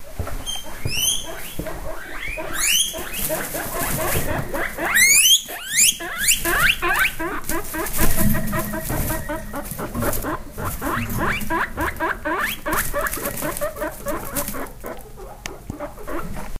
Goui-gouis 2
My Guinea pigs...
pigs, guinea